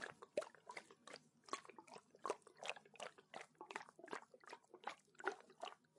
Hot-water bottle shaker loop
Jiggling a duck-shaped hot-water bottle in front of my Zoom H6. My neighbours must think I'm a retard.
bottle, duck, jug, loop, organic, percussion, rhythm, shaker, stereo, water